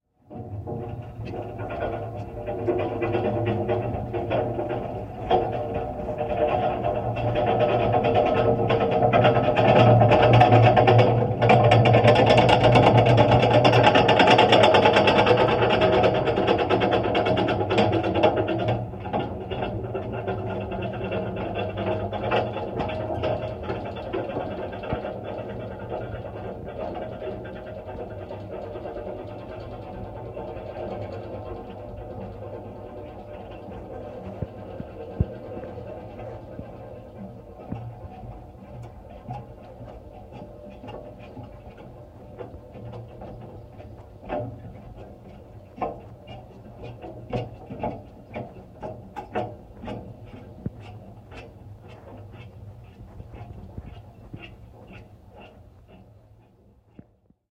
Contact Mic Newport Footbridge Floor 02
contactmic, vibration, footbridge, eerie, contact, newport, southwales, bridge, rumble